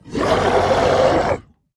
A monster roaring.
Source material recorded with either a RØDE Nt-2A or AKG D5S.